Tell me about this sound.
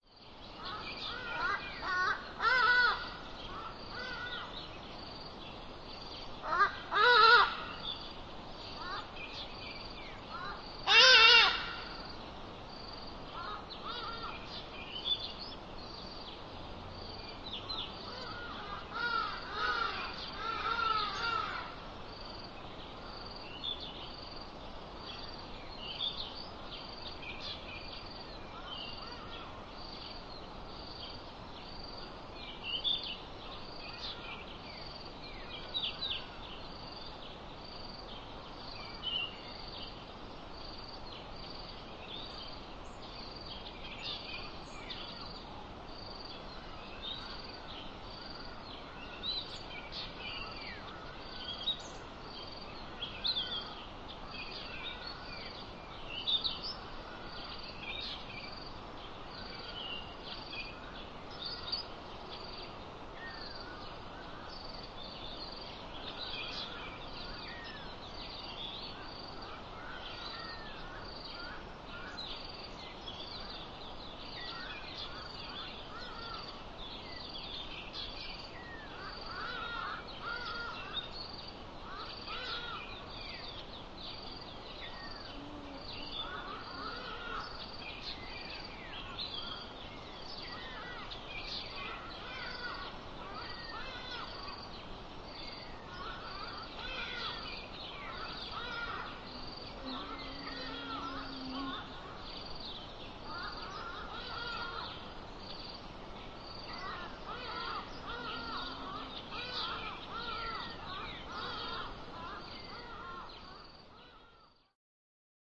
BRG Dwn 5 Hadeda
Birds, Hadeda Bird, insects, distant river; Central Drakensberg, South Africa; Recorded using a vintage Phillips EL-1979 microphone and a Sony MZR55 MiniDisk Recorder
Drakensberg, Hadeda, Insects, Dawn, Birds